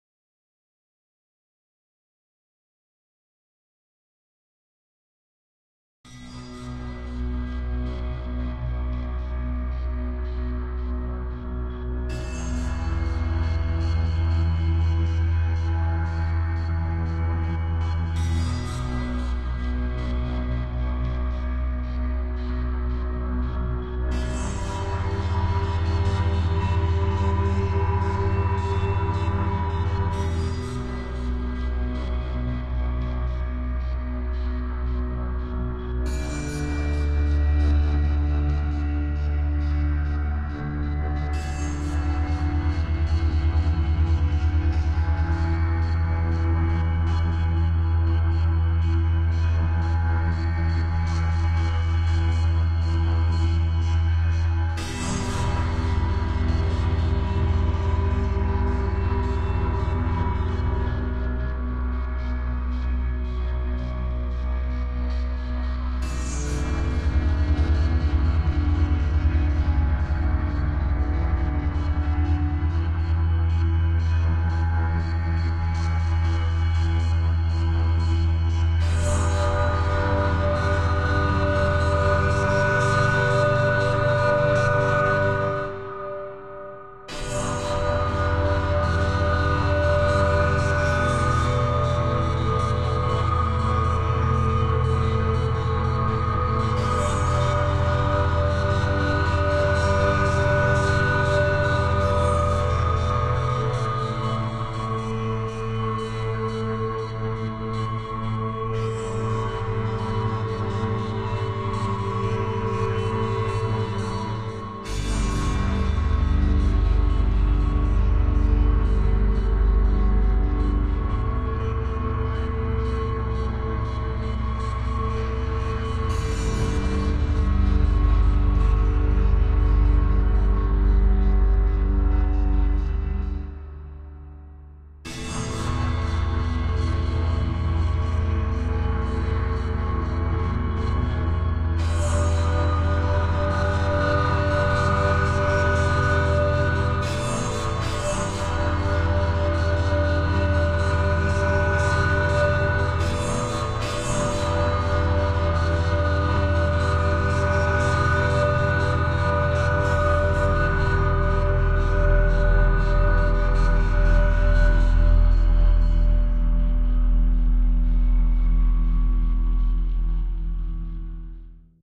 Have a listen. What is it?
Em Pentatonic Pads 80bpm
Chords in E minor played on two virtual Cinematic pads in Music Maker. Sounds good with drum and bass loops at 80bpm.
80bpm, E-minor, ambiance, ambient, atmosphere, dreamy, electronic, ethereal, key-of-E-minor, loopmusic, mood, music, pad, sample, smooth, soundscape, synth